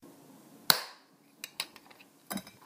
I snapped a piece of long, thin plastic and decided to record it. You can use this sound for either glass or plastic, it sound like both
Break, Glass, Plastic, Snap